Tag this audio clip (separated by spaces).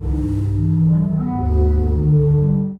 breathing field-recording giant sea-organ sonokids-omni